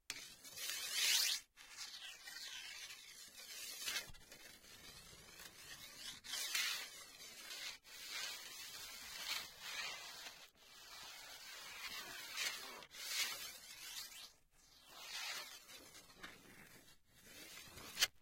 Queneau frot metal 02
prise de son de regle qui frotte
metal, frottement, clang, metallic, piezo, cycle, steel, rattle